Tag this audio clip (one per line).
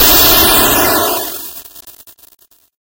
army
artillery
bomb
boom
destruction
explosion
explosive
game
games
military
video
war